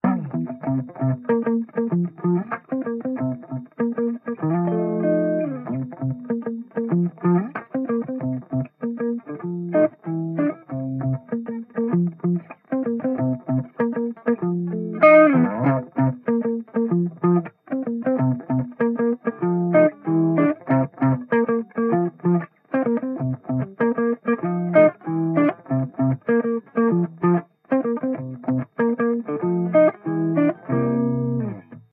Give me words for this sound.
Funky Soul Acid Guitar 3 - 96bpm
96bpm, ambient, cool, fender, funky, groovy, guitar, improvised, lofi, loop, oldtape, quantized, soul, vintage